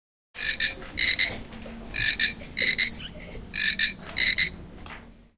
mySound-49GR-Joana
Sounds from objects and body sounds recorded at the 49th primary school of Athens. The source of the sounds has to be guessed.
49th-primary-school-of-Athens, frog, TCR, toy